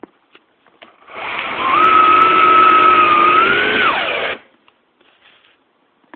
Akkuschrauber Screwdriver at work